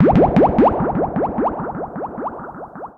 sonokids-omni 17
abstract, analog, analogue, beep, bleep, bubble, cartoon, comedy, electro, electronic, filter, fun, funny, fx, game, happy-new-ears, liquid, lol, moog, ridicule, sonokids-omni, sound-effect, soundesign, synth, synthesizer, toy